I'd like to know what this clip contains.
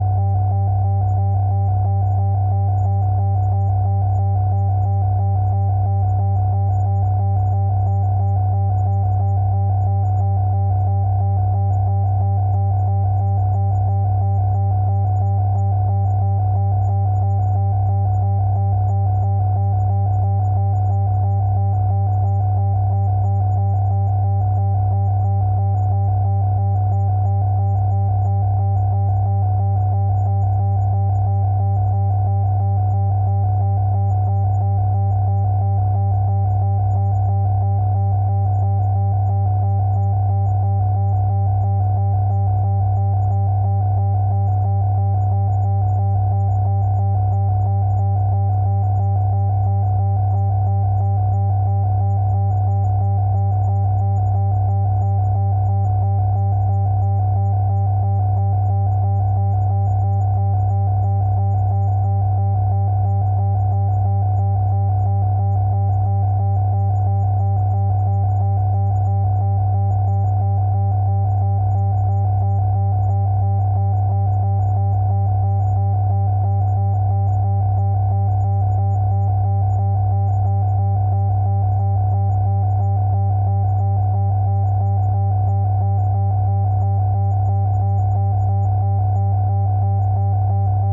system 100 drones 6
A series of drone sounds created using a Roland System 100 modular synth. Lots of deep roaring bass.
ambience, analog-synthesis, bass, bass-drone, deep, drone, low, modular-synth, oscillator, Roland-System-100, synthesizer, vintage-synth